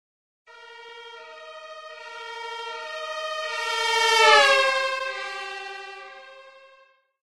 Doppler siren
Swedish ambulance siren (mono from the start) converted to stereo and processed thru a Doppler filter. This creates a really nice stereo effect.
emergency, doppler, siren, processed